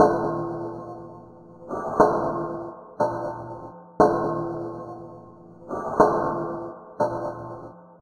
Anvil loop
Various loops from a range of office, factory and industrial machinery. Useful background SFX loops
machine, office, factory, plant, machinery, loop, sfx, industrial